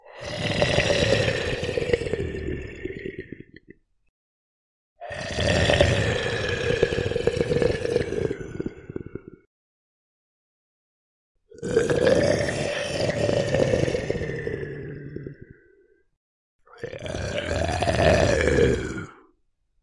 Monster Moan Groan Growl 2
beast, beasts, creature, creatures, creepy, dnd, dragon, dragons, dungeons, dungeonsanddragons, fantasy, growl, growls, horror, monster, noises, podcast, processed, rpg, scary, scifi